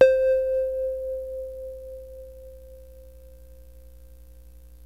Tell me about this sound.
Fm Synth Tone 07
portasound, pss-470, synth, yamaha, fm